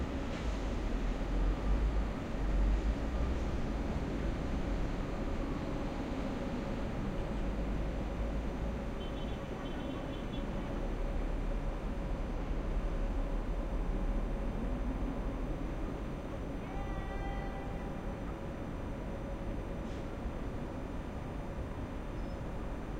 SKYLINE Jecklin disk 02
Recording from top floor clarion hotel oslo. Recording from the terrace suite of the hotel and i have been useing two omni rode mikrofones on a jecklin disk. To this recording there is a similar recording in ms, useing bothe will creating a nice atmospher for surround ms in front and jecklin in rear.